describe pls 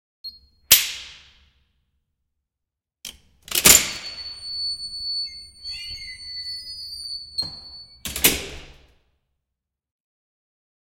door metal heavy push bar beep open close with security deadbolt unlock click3 +tone
click, close, heavy, metal